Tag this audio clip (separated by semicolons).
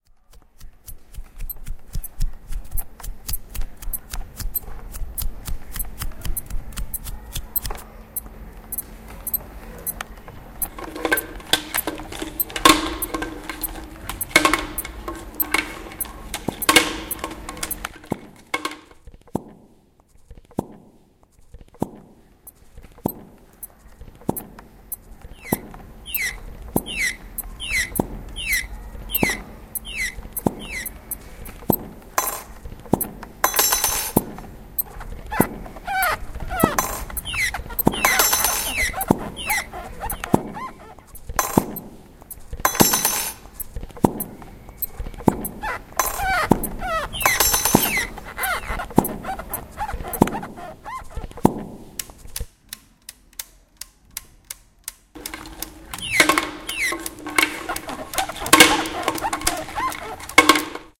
belgium; cityrings; soundscape; toverberg